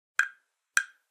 sonido mensaje grabado
message, UEM, madera, cell-phone, mensaje, mobile, wood